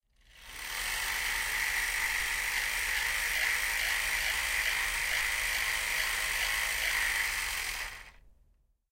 Here is the sound of film moving through a Mansfeild Film Camera